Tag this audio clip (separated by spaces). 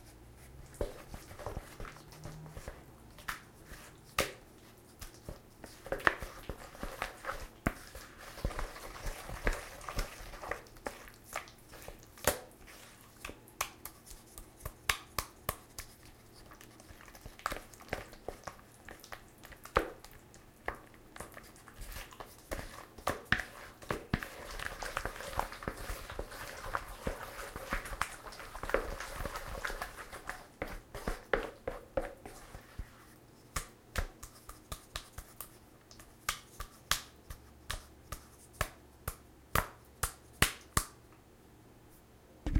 foley gross kitchen moist noise slime sound-effect squish stirring wet